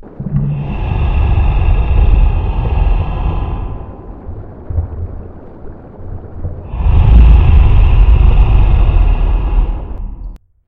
Made with altered sounds of me growling, my microphone being shook and a free royalty sound of a spa.